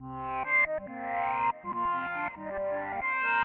Chill melody
Chillstep, DNB, techno, tekno